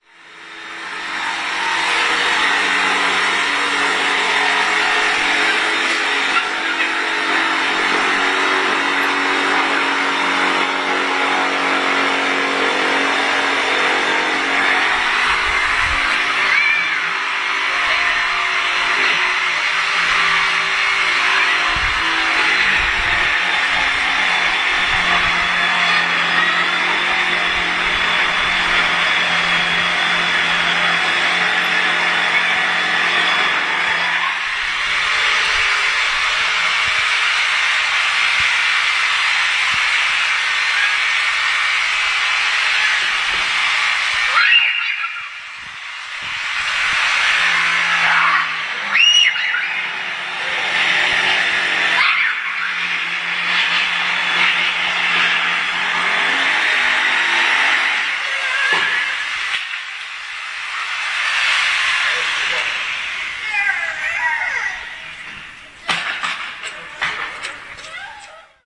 neighbour artur makes the noise270710
21.07.10: about 14.00. my neighbour Artur is (probably - I am not sure) drilling something on his balcony (it's the one floor below mine). on the courtyard was his the youngest daughter who are watching with deep interest what her father is doing.